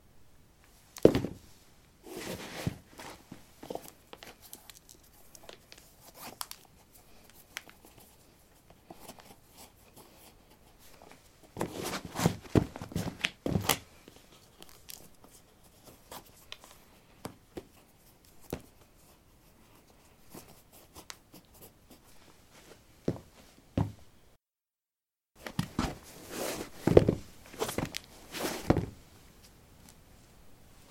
concrete 14d lightshoes onoff
Putting light shoes on/off on concrete. Recorded with a ZOOM H2 in a basement of a house, normalized with Audacity.